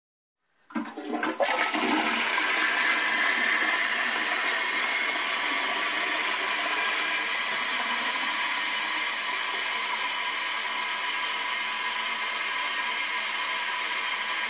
toilet flash
dropping, emergency, flash, toilet, water